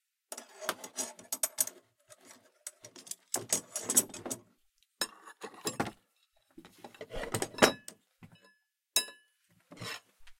plundering-the-toolbox
Foley for going through a toolbox to find the right tool, screwdriver, hamer, knife etc.